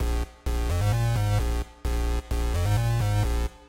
Electrio Bass 130 BPM
A Electro Loop created using NI Massive and 3rd party effects